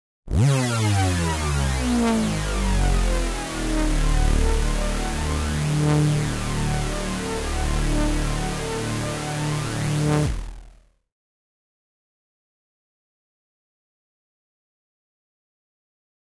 Hoover Meets THX Sound
An Hoover sound where the tail end sounds remarkably similar to the THX movie sound.
Film, Hoover, Hoover-sound, Movie, THX, THX-sound